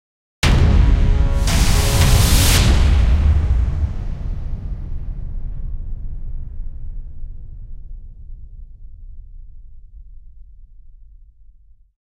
Impact Transition
Had a lot of fun with this one. Was up awaiting moderation but decided to make the brass slightly louder before it was approved. Used Kontakt, and Damage with a fair amount of compression on the master chain.
action, cinematic, cue, dramatic, movie, orchestral, scene, strings